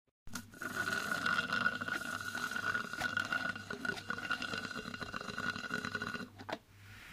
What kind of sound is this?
Slurping Thick milkshake out of straw
drinking, eat, OWI, slurp, slurping, straw, suck